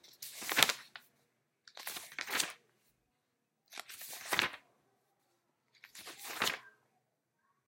To read, passing pages.